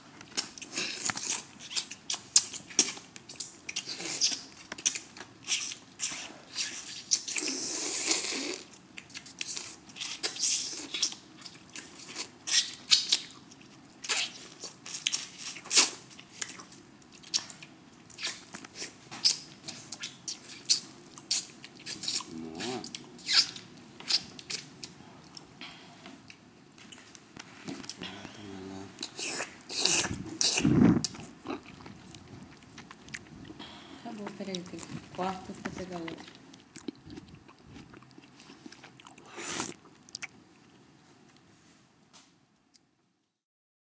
Woman slurping lychees and licking her fingers
slurping, eating